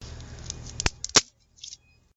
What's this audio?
A stick that is being broken.
loud, stick, breaking